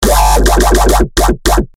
Bass 7 - Classic
140BPM, Bass, FLStudio12, Sytrus